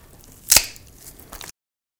Pumpkin Guts Squish